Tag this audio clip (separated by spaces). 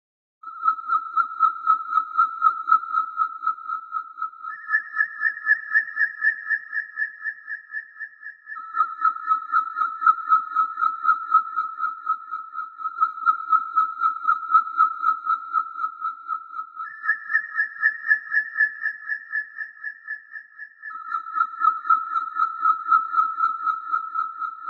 fx synthesizer hollow effects sound